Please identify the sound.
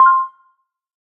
GASP UI Confirm
Sound FX for signaling a correct selection or confirmation.
Confirm, FX, Interact, UI